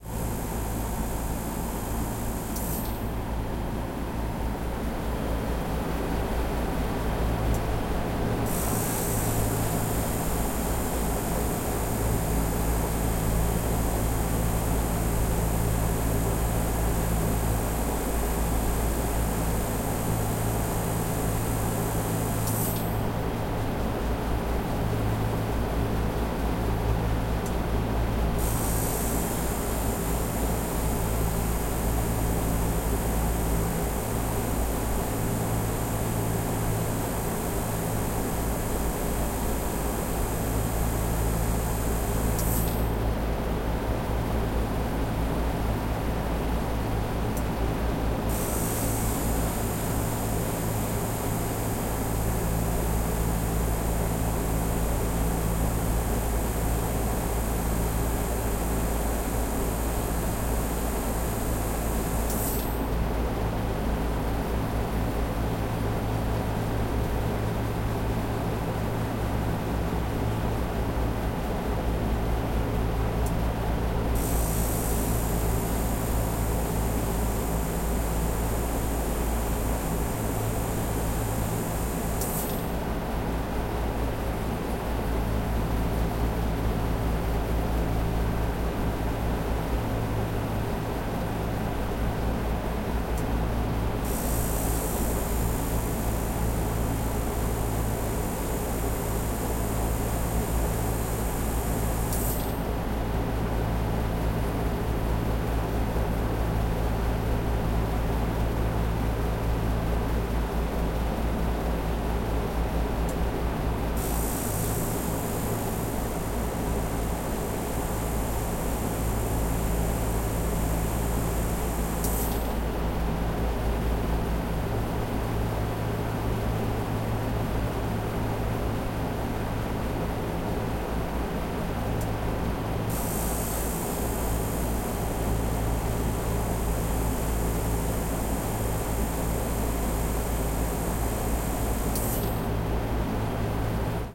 0056 Air conditioner
Air conditioner device with a sequence
20120116
air-conditioner, field-recording, korea, seoul